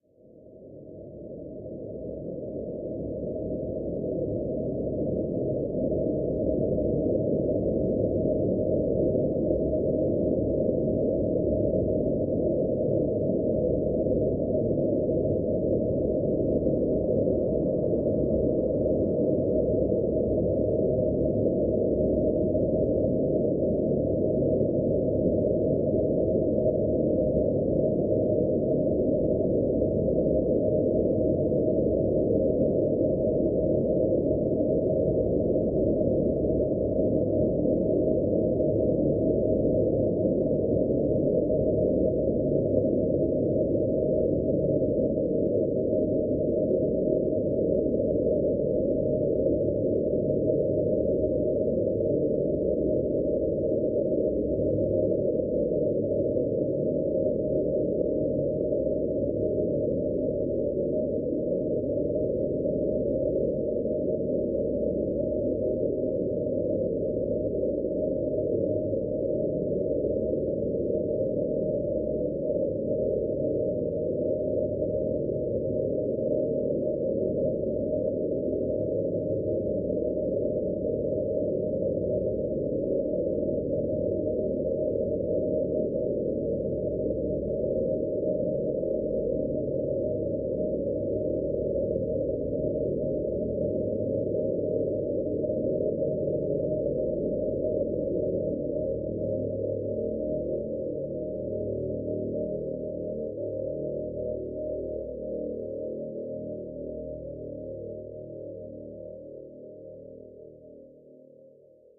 drone-like soundscape, nice to use as a sub-layer for dark atmospheres.This was created in MAX/MSP in a study of noise-processings.